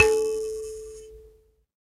SanzAnais 69 A3 bzzfun
a sanza (or kalimba) multisampled with tiny metallic pieces that produce buzzs
kalimba, sanza, african, percussion